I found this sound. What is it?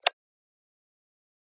A click-ish sound generated completely from scratch with Image-Line's Harmor; processed with CamelCrusher, Fruity Waveshaper, and Fruity Parametric EQ 2.
Has a slight attack, but still snappy.